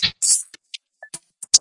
A pack of loopable and mixable electronic beats which will loop at APPROXIMATELY 150 bpm. You need to string them together or loop them to get the effect and they were made for a project with a deliberate loose feel.
electronic, 150-bpm, music, beat, drum, electro, processed, noise, loop